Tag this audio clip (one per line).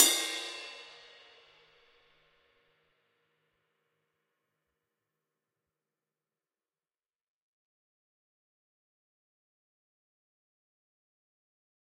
drums
stereo
cymbal